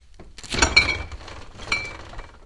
freezer open
open a freezer door, some bottles clack